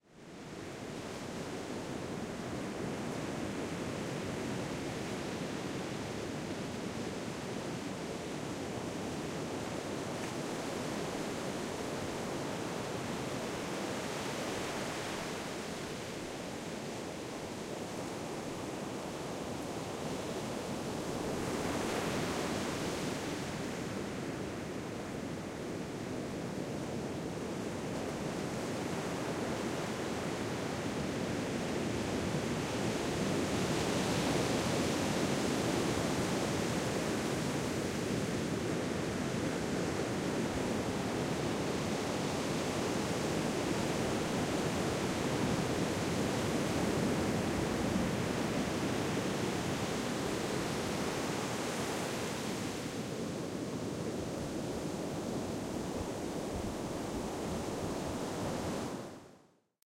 Low Rolling Surf 1
Constant low rolling surf coming onto the a shallow sloping sandy beach, recorded from about 20 meters from the water.
ambience, ocean, stereo, surf